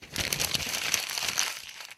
es-papercrumple
crumple, page, paper